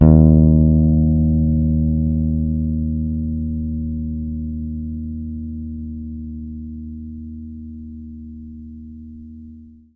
this is set of recordings i made to sample bass guitar my father built for me. i used it to play midi notes. number in the filename is midi note.